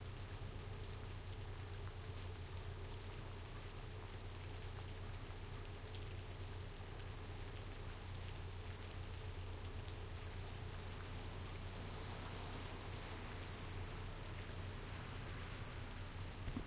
short clip of rain, you can hear a car drive by on the wet streets